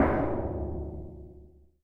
Single hit on a small barrel using a drum stick. Recorded with zoom H4.
percussive, metal